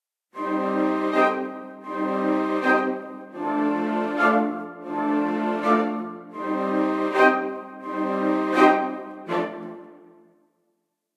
made with vst instruments
ambience, ambient, atmosphere, background, background-sound, cinematic, dark, deep, drama, dramatic, drone, film, hollywood, horror, mood, movie, music, pad, scary, sci-fi, soundscape, space, spooky, suspense, thiller, thrill, trailer